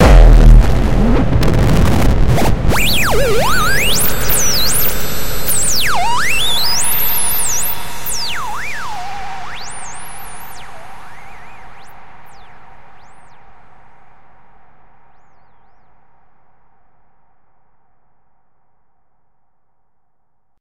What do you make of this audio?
Hardcore boom 4
The noisy quality of this kick is immense. A deep rumbling kick with a weird highpitch drunk note like a mad singer heading up and down the frequencies like a pure maniac.
hardcore, big, weird, boom, reverb, bassdrum